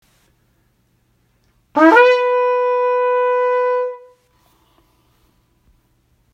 shofar blast medium length two tone
this is a medium length two-tone shofar blast
Jewish, shofar, trumpet